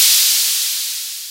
A collection of electronic percussive sounds programmed on the software version of Waldorf's Rack Attack drum synthesizer.
hihat, open